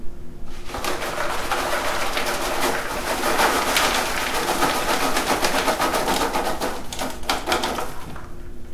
Serving popcorn in a bowl
food, popcorn, snack